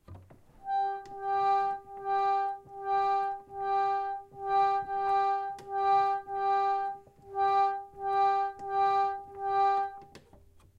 Pump Organ - Mid G
Recorded using a Zoom H4n and a Yamaha pump organ
g, g3, note, organ, pump, reed